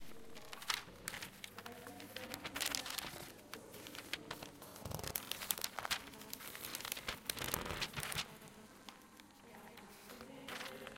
20141118 changing pages H2nextXY
Sound Description: a person changes pages from a magazin
Recording Device: Zoom H2next with xy-capsule
Location: Universität zu Köln, Humanwissenschaftliche Fakultät, Herbert-Lewin-Str. in the IBW building, ground floor
Lat: 50.93381 6.92183
Lon: 6.92183
Date Recorded: 2014-11-18
Recorded by: Kristin Ventur and edited by: Darius Thies
This recording was created during the seminar "Gestaltung auditiver Medien" (WS 2014/2015) Intermedia, Bachelor of Arts, University of Cologne.